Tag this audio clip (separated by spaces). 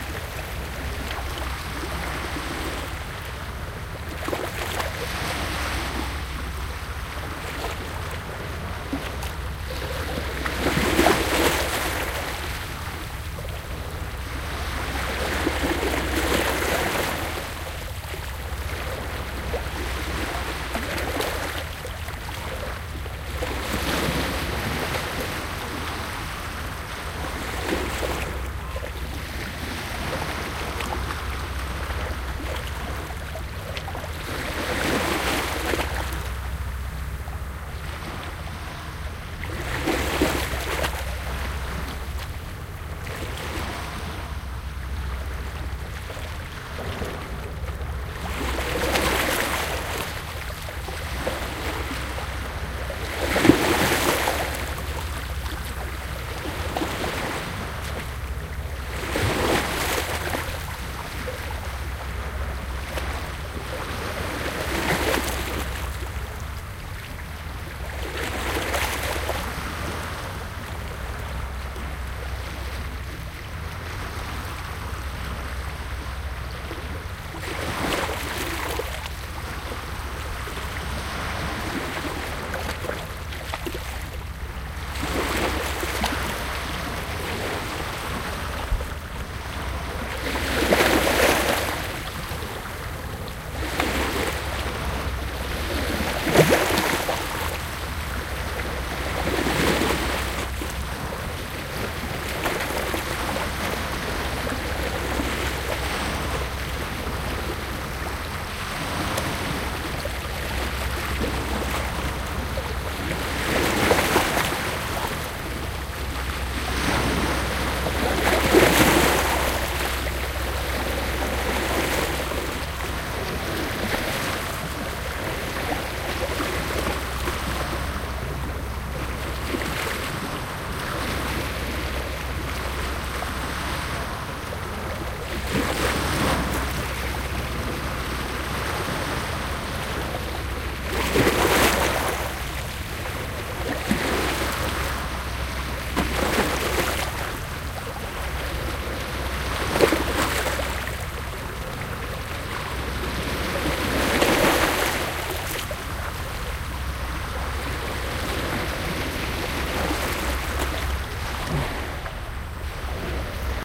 binaural waves ocean field-recording